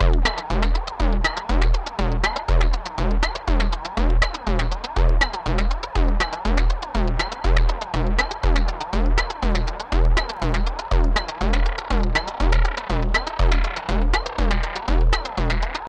Zero Loop 6 - 120bpm
Distorted, Loop, Percussion, Zero